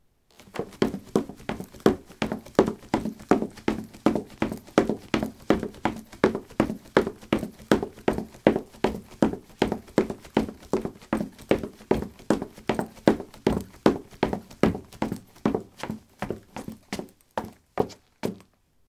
wood 17c boots run
Running on a wooden floor: boots. Recorded with a ZOOM H2 in a basement of a house: a large wooden table placed on a carpet over concrete. Normalized with Audacity.